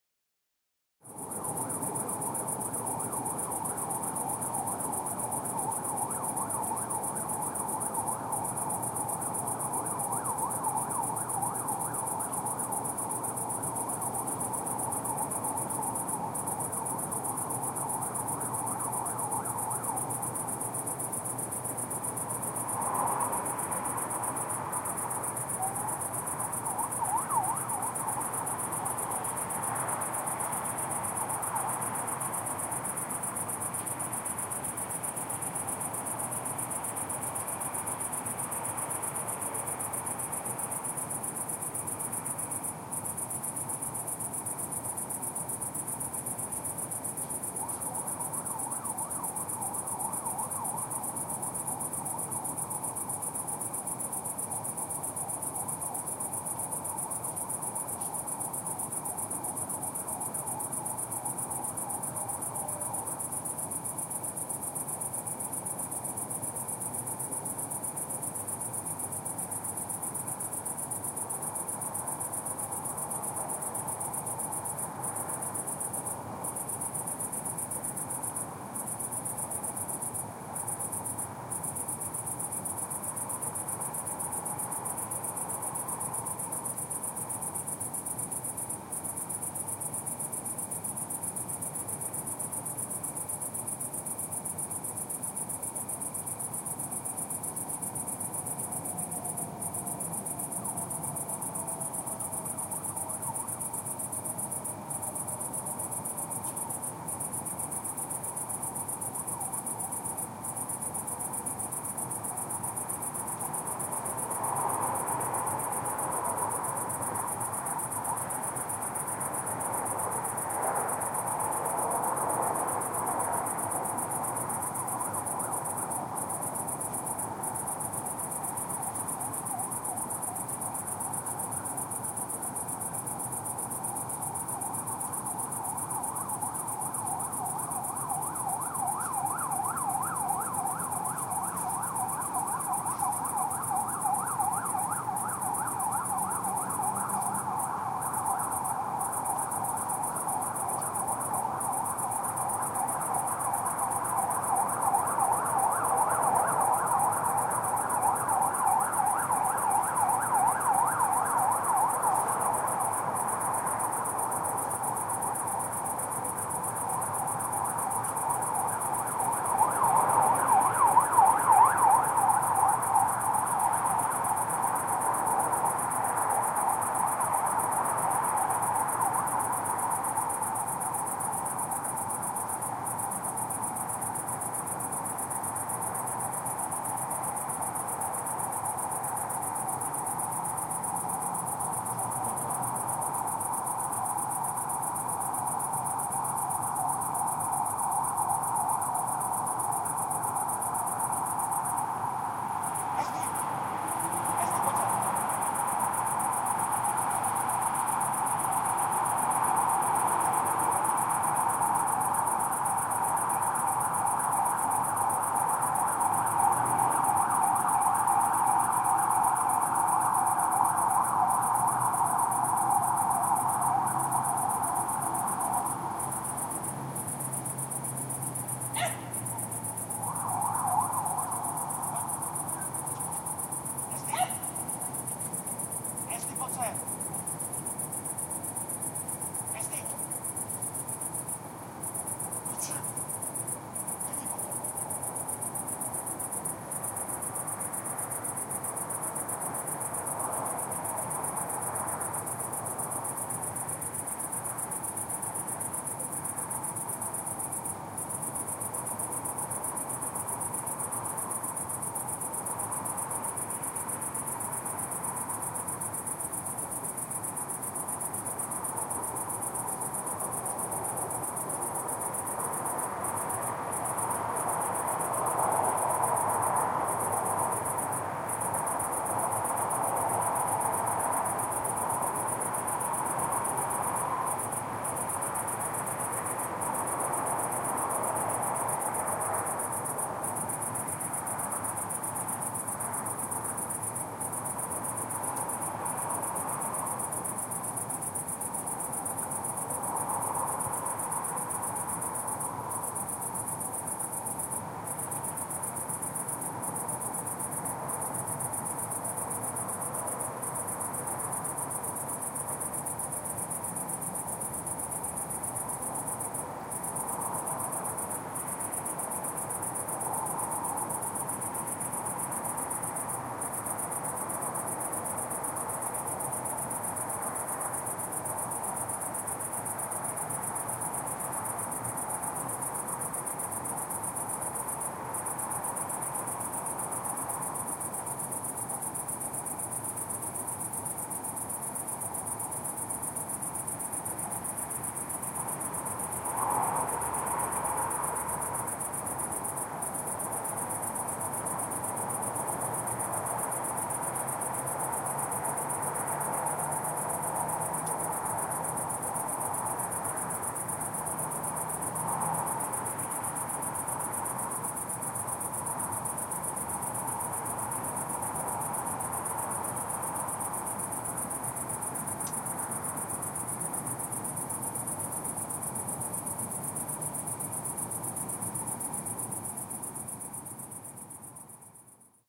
Cricket in tree 2, man with a dog
Recording of the Prague ambiance in the evening.
Recorded at night in august, on the Prague periphery. Crickets, cars, trams in distance, sirens, steps, man with a dog and calling him, barking dog.
Recroded with Sony stereo mic on HI-MD